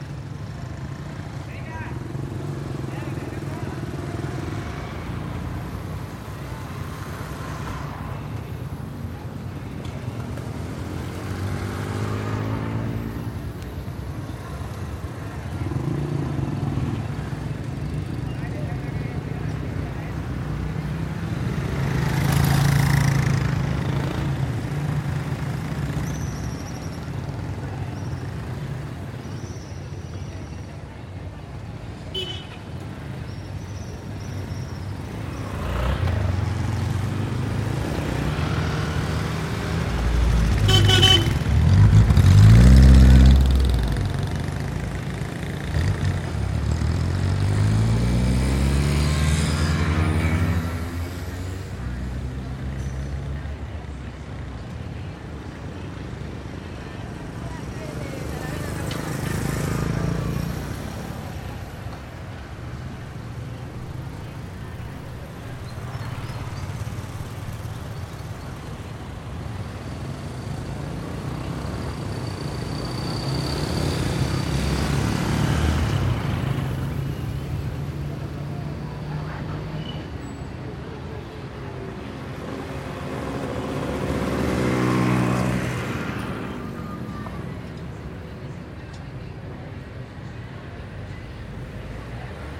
traffic pass bys nice throaty motorcycles and horn honk middle maybe as moto cuts him off Saravena, Colombia 2016
traffic pass bys nice throaty motorcycles and horn honk middle maybe as motorcycle cuts him off Saravena, Colombia 2016